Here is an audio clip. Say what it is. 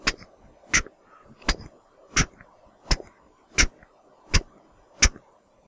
Recorded by mouth